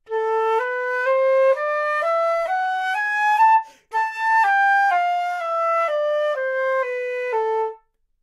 Flute - A natural minor
Part of the Good-sounds dataset of monophonic instrumental sounds.
instrument::flute
note::A
good-sounds-id::6980
mode::natural minor